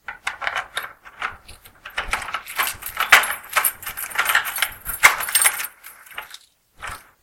keys on door and open
Keys going in the door and turning Open/close. Recorded in Audacity in mono.
door, key, keys, opening